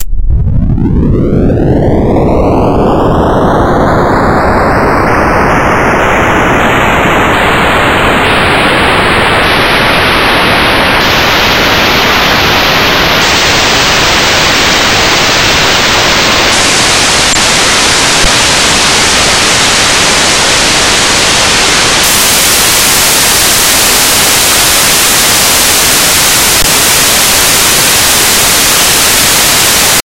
16 LFNoise1 FreqSweep
This kind of noise generates linearly interpolated random values at a certain frequency. In this example the frequency increases.The algorithm for this noise was created two years ago by myself in C++, as an imitation of noise generators in SuperCollider 2. The Frequency sweep algorithm didn't actually succeed that well.